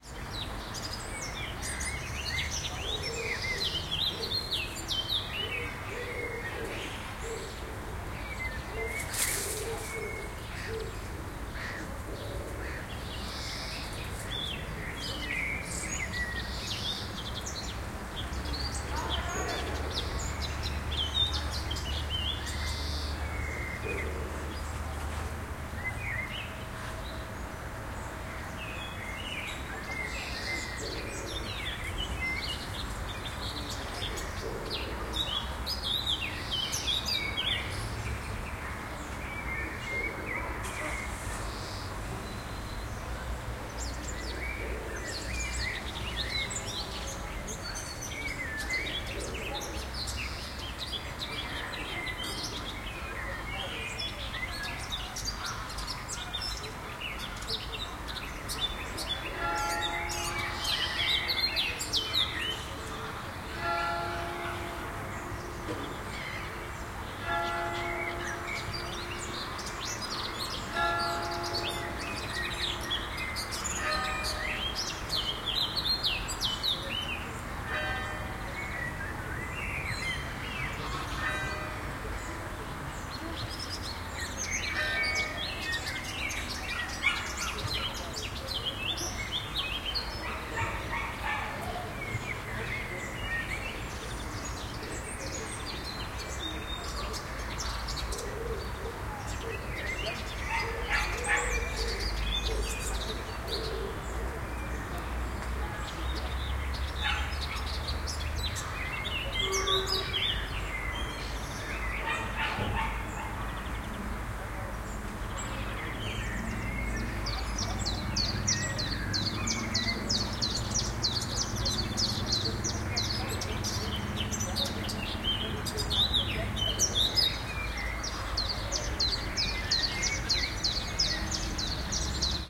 Old City Ambience, with common wood pigeon and blackbirds as well as several other birds. Some distant traffic and other human activity. Bells start ringing half-way through.
Recorded with a Marantz and two DPA 4061s on the ground with a couple of metres between them. Wide stereoimage!
field-recording, birds, background, ambiance, atmosphere, City, distant, atmos, nature, wide, soundscape, outdoor, DPA, wood, Old, stereo, 4061, background-sound, ambience, cars, atmo, common, Marantz, pigeon, ambient, wild